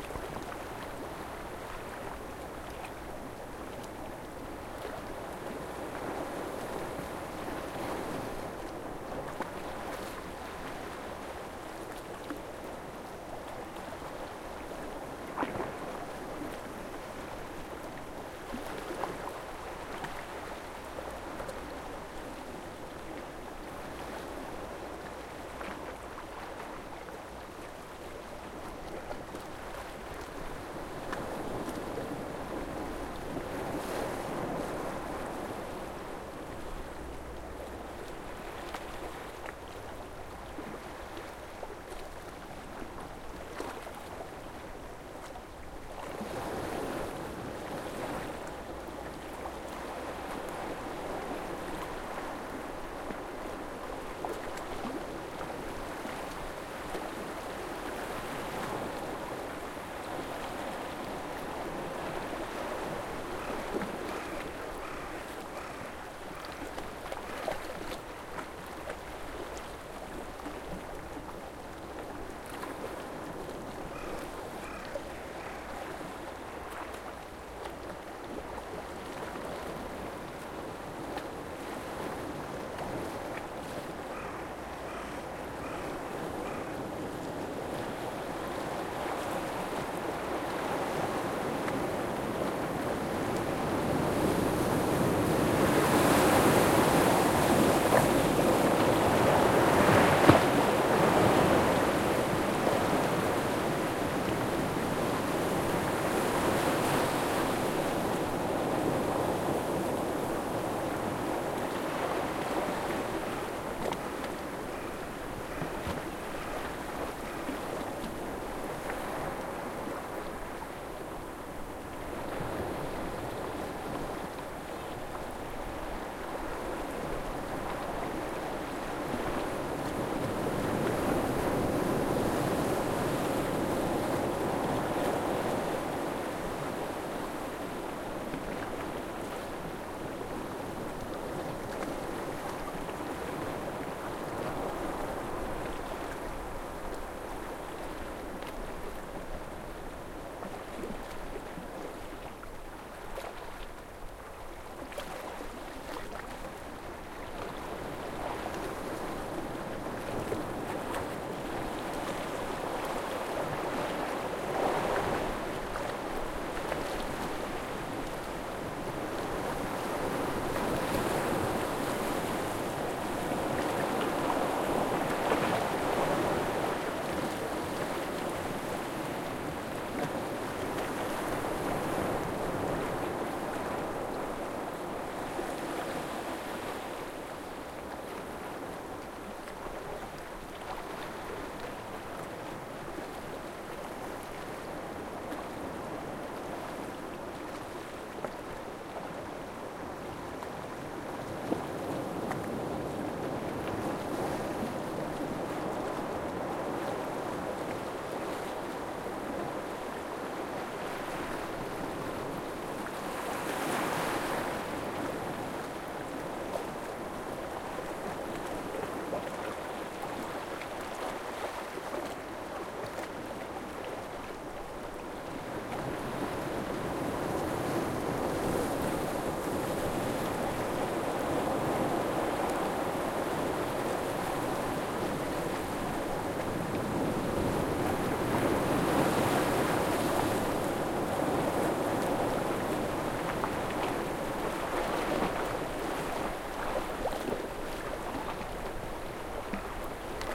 A shore sound recorded on Atsumi Yamagata Japan.
atsumi, crow, field-recording, japan, sea, shore, wave, yamagata